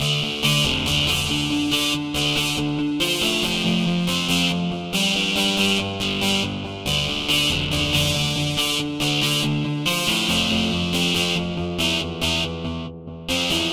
double overtime loop 140bpm
A loop I came up with that I'm calling "double overtime". Kind of a good and bad feeling at the same time ;) I wanna go home!
So yea I thought it sounded sweet.
grimyloop, saw-loop